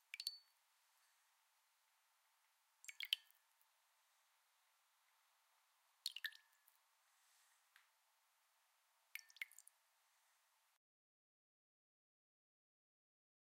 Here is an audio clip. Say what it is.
Water splash & drops 1
Water splashing and dropping into a glass.